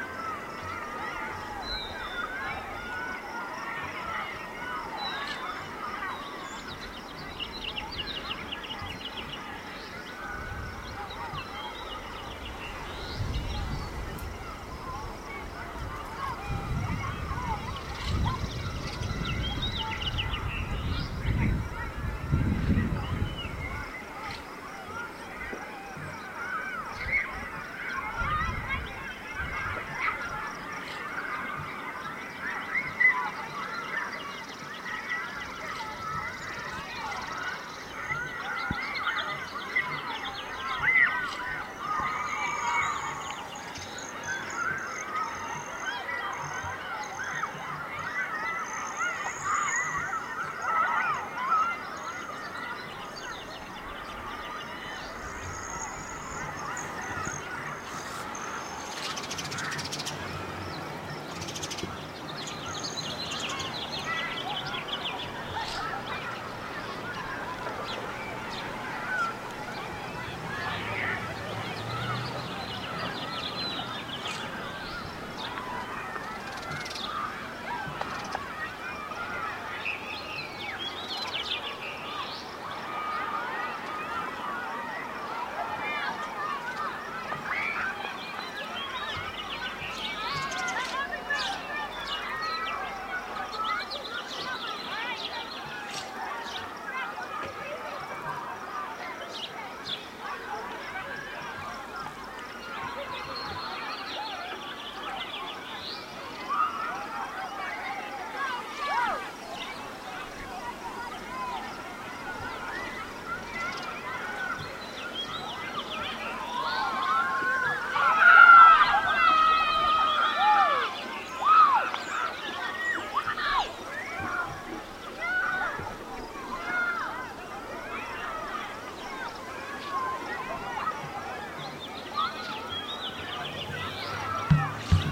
This is a section of a recording I did when a school nearby had a field day. There's a decent part in the middle, where the wind sound is practically gone.
I used some filters to take out the wind sound as much as possible, but the high end whistling of the wind is still a bit audible.